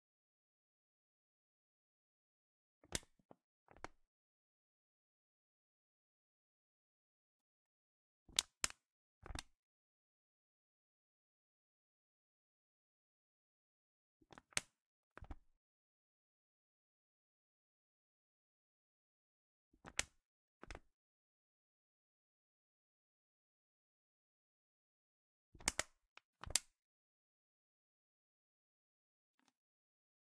Recorded in Home studio, you can use this effect free for your works. The sound is Press a Plastic bottle and letting it go back to its shape
Bottle, Crush, Drink, FX, Home-studio, Plastic, Push, Recorded, Sound-effect